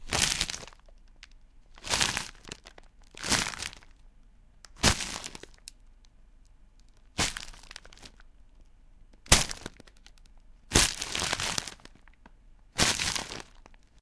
Zombie Flesh Bites
Possibly used for zombies tearing at someones flesh, or someone breaking a bone. Done with a bag on skittles and a Blue Yetti
attack, bleeding, eating, flesh, rip, wound, zombie